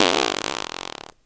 A famous fart recorded with a with a Samson USB microphone.
sound, body, fart, human